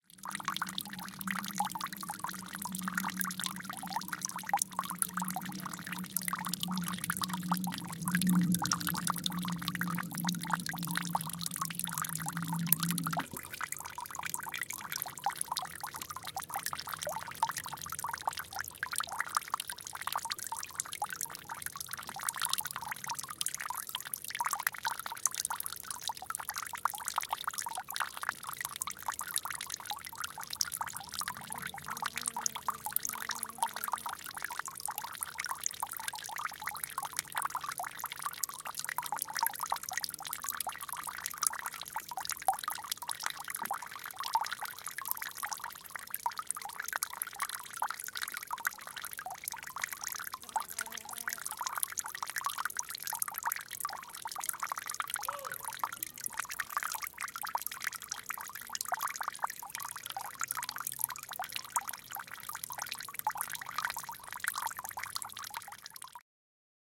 Single Small Fountain Recorded with Zoom H4